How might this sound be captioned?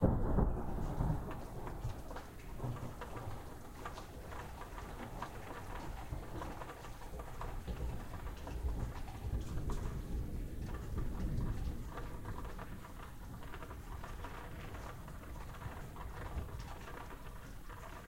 A small crack of thunder from a rainstorm in Southern California, as heard from inside my home.
Recorded on a Tascam DR-07. Made into a tuned loop using Image-Line Edison -- this file has some slight de-noise-ing done to remove the more obvious elements of the rainy backdrop.